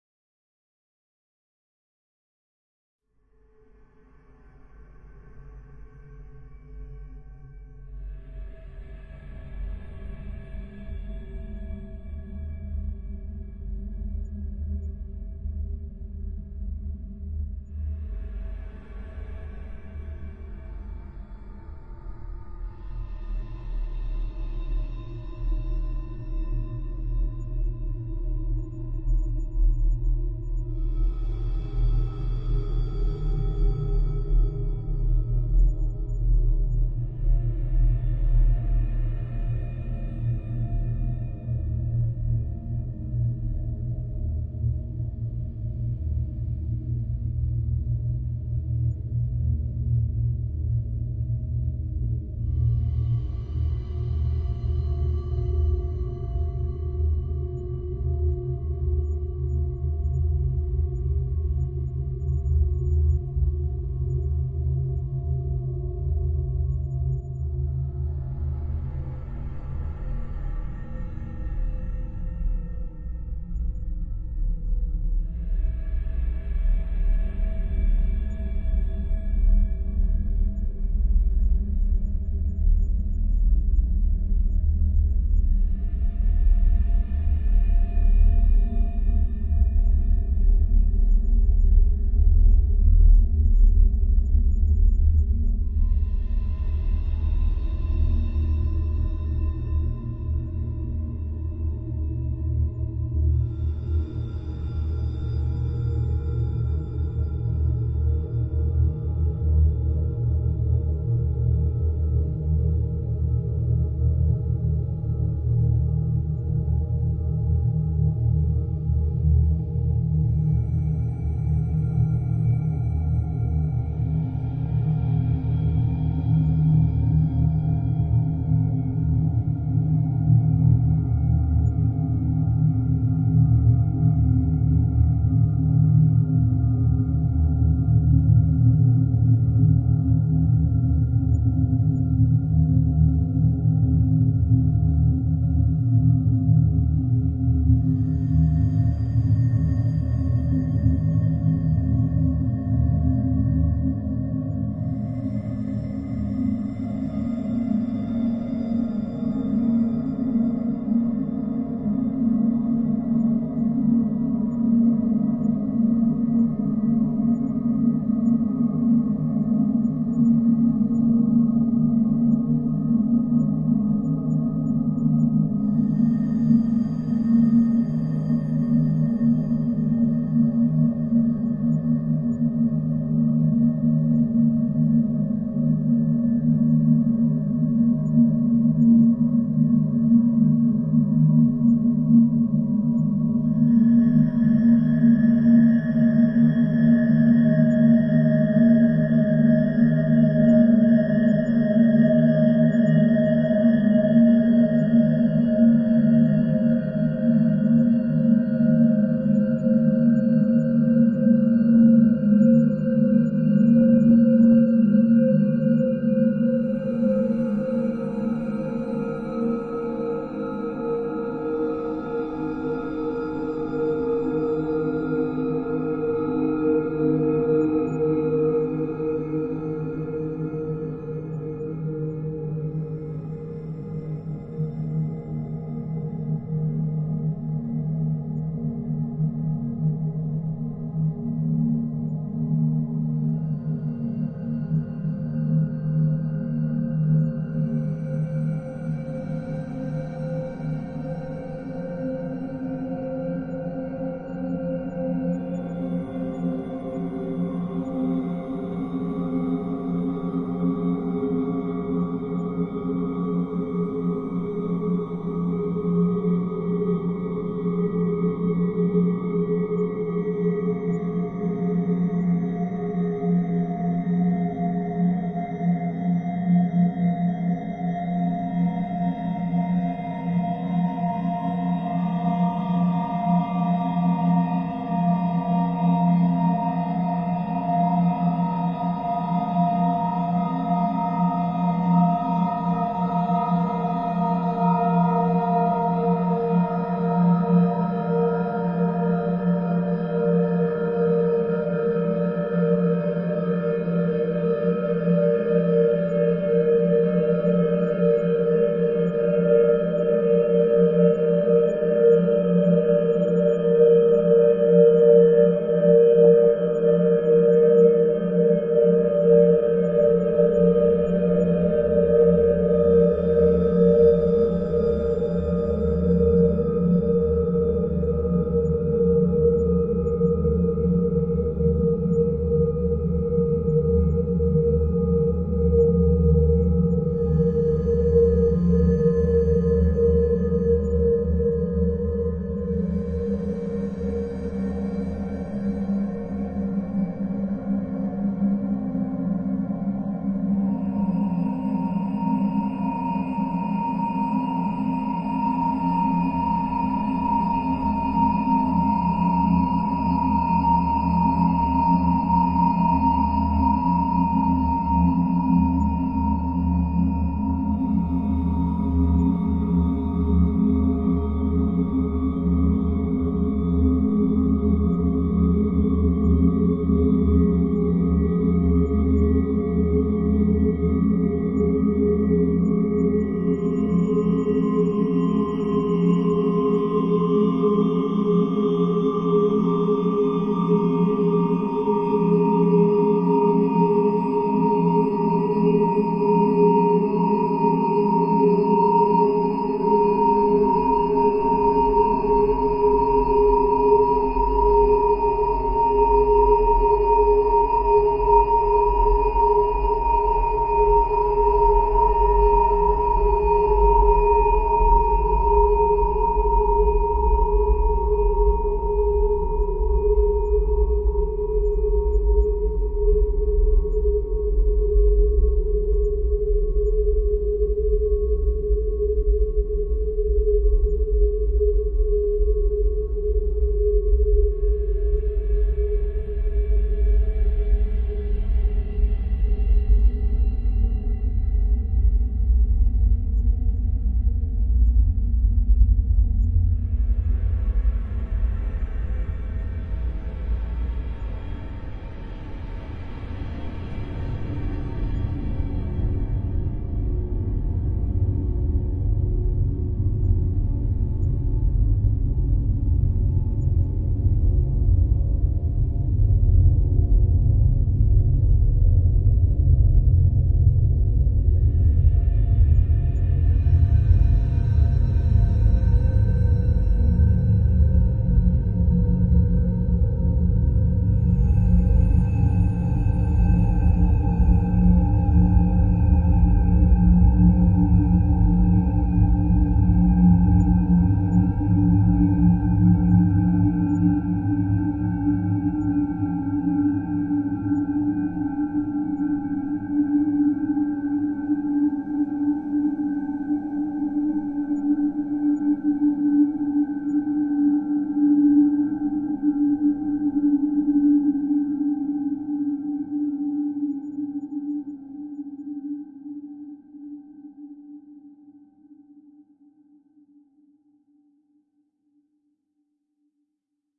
Inside Path pad
dark, pad